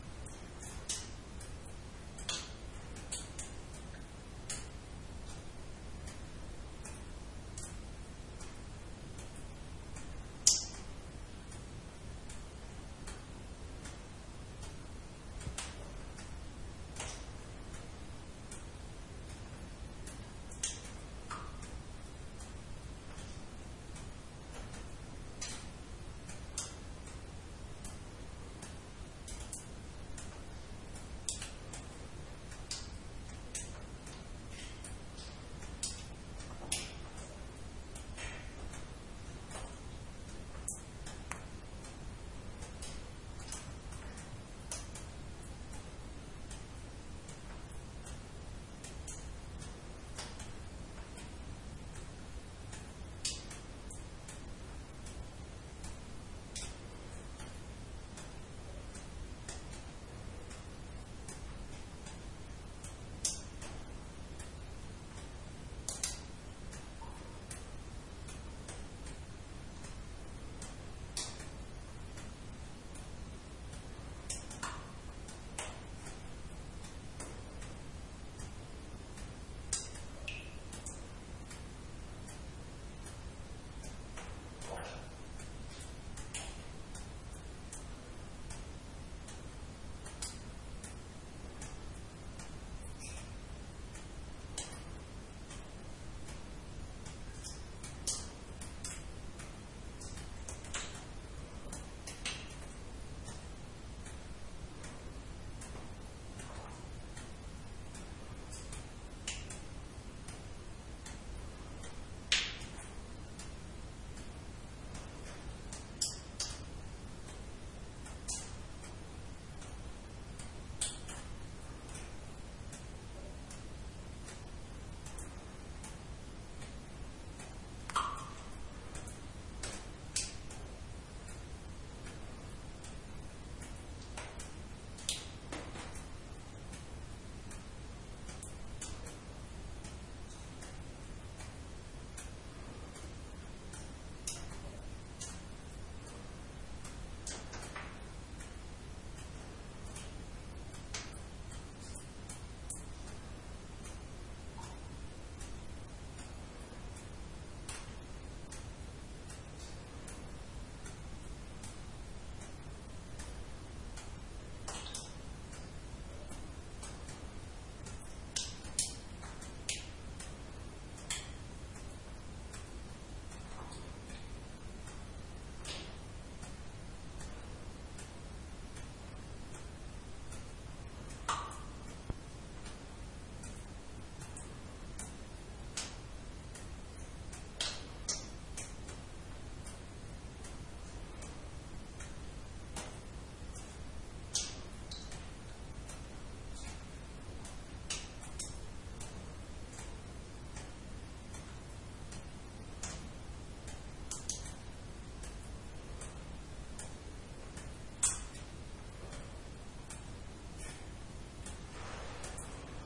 cave waterdrops
waterdrops fall into a see in a cave. you hear also the environment of the cave.
recorded with neumann stereoshotgun rsm 191 and a sounddevice 722.